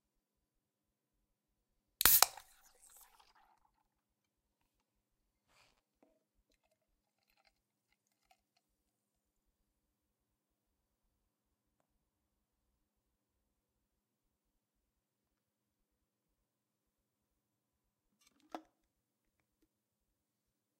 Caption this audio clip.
Can Pop
Close mic recording of a "draught" (widget) beer can, including pour and set-down.
beer, can, drink